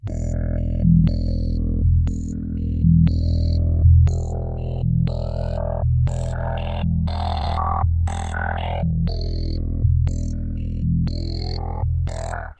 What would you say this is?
Deep gated vocal
My voice running through a vocal effects pedal then rhythmicly filtered in the octatrack.
vocal, rhythmic, effects